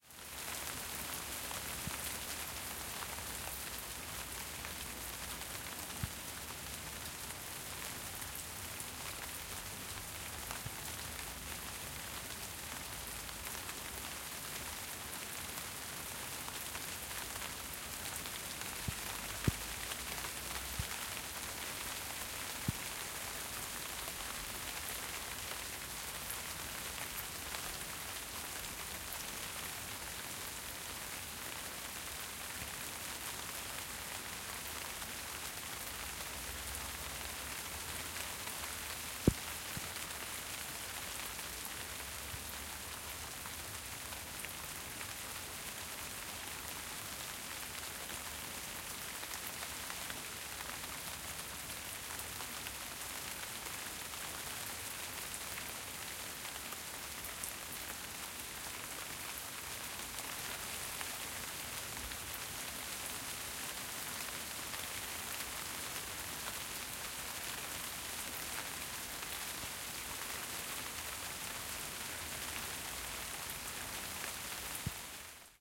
Steady rain in Zeist
Zoom H4n X/Y stereo field-recording of steady rainfall. Good stereo imaging.
shower, soundscape, ambient